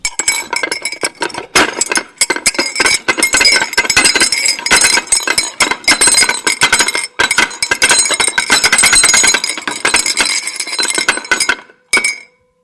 Sounds For Earthquakes - Cutlery Metal
I'm shaking some cutlery around. Recorded with Edirol R-1 & Sennheiser ME66.